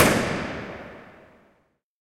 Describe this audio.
Poigne proche short01
Stereo ORTF recorded with a pair of AKG C451B and a Zoom H4.
It was recorded hitting different metal stuffs in the abandoned Staub Factory in France.
This is part of a pack entirely cleaned and mastered.
industrial, percussive, metal, field-recording, percussion, metallic, hit, staub, drum